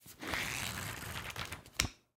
Book page change 1
page, flip, turn, change, book, sheet, paper, movement